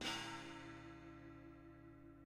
China cymbal scraped.